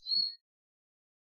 This sound is of a single bird chirp
Call, Bird, Chirp